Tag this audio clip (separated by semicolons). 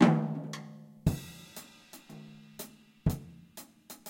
drum; loop